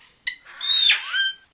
You hear a Kakadu cry.